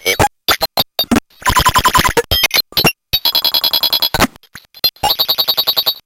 mid cymbaly 1
This is a short sample of some random blatherings from my bent Ti Math & Spell. The title is my best effort at describing the sound.
random, circuit, bent, phoneme, spell, analog